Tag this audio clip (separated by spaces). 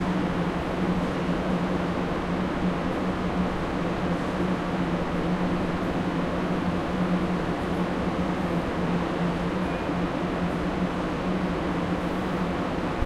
wroclaw factory